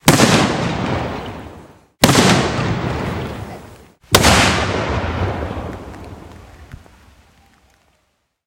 Blowing up improvised explosive devices.